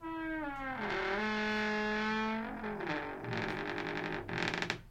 Door creaking.
Mic: Pro Audio VT-7
ADC: M-Audio Fast Track Ultra 8R
See more in the package doorCreaking
creaking, door, door-creaking, noise